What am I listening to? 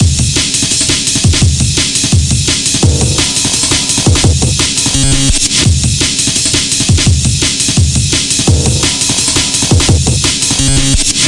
IDM Drum Loop (170 BPM)
glitchy, break, drums, breakbeat, breaks, idm